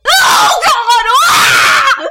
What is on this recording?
OH GOD WAH!
I recorded my voice while playing freelance horror games; SCP-087-B and Slender Sanatorium. this was so I could get genuine reactions to use as stock voice clips for future use. some pretty interesting stuff came out.
this one is my favorite. after this exclamation, I was laughing so hard, and was like "oh god wah? is that what I just said? that's what I just said!!"